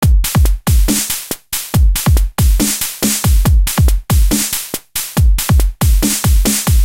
dubstep loop 140BPM 1
140, loop, kick, dubstep, BPM, snare, hat, hi